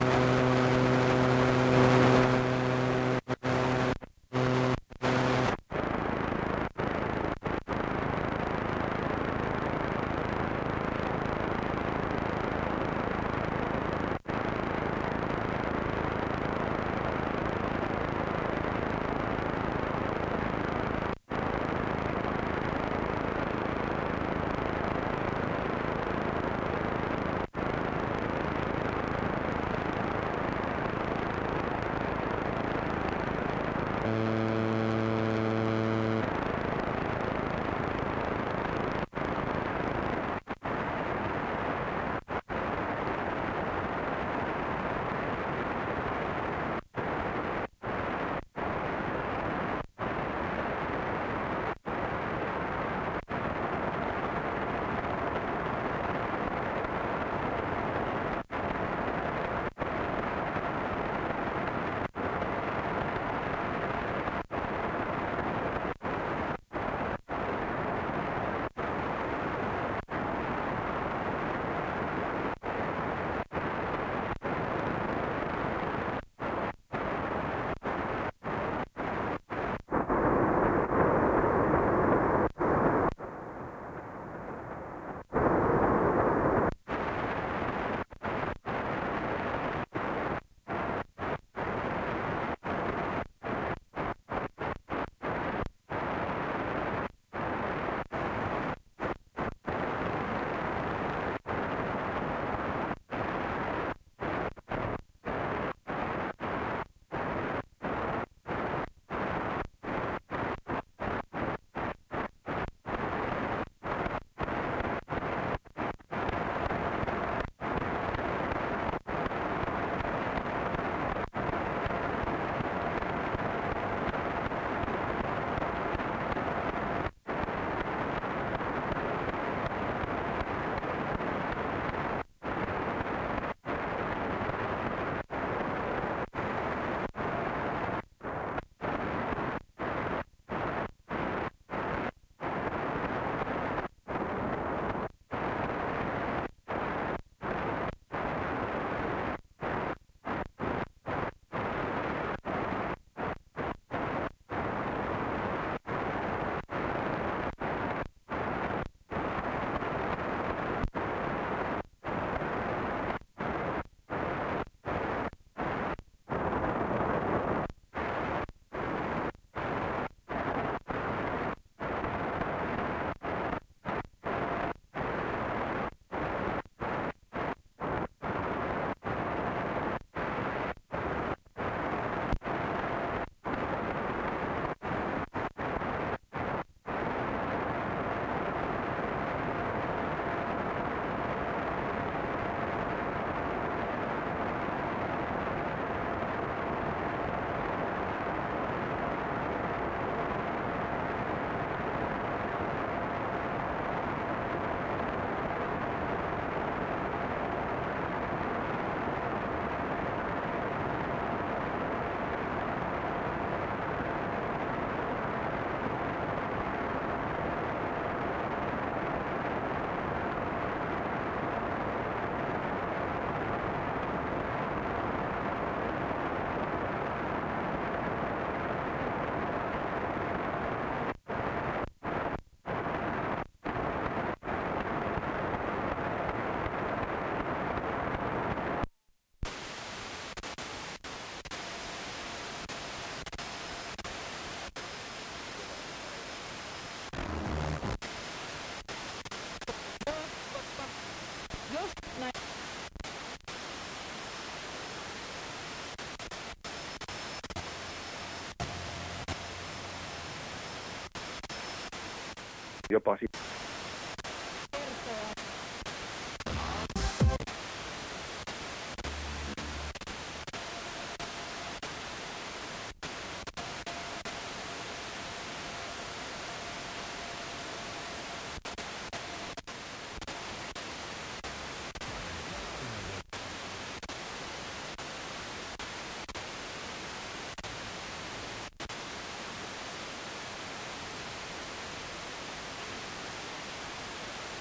Searching radio stations
This is captured by scanning FM-band with Tecsun PL-380. Recorded to Zoom H1n from headphone output. Normalized with Audacity, no other editing.
FM, hum, scanning